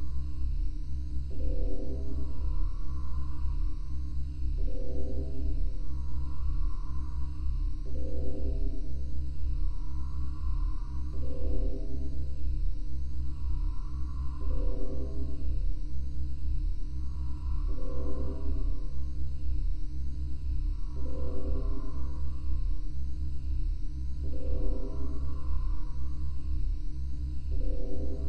Alarm sound 14
A futuristic alarm sound
Alarms, Bells, Futuristic, Sci-Fi, Space